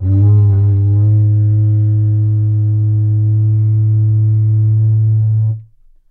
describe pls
Cardboard tube from Christmas wrapping paper recorded with Behringer B1 through UB802 to Reaper and edited in Wavosaur. Edit in your own loop points if you dare. Nice clean and loopable sample.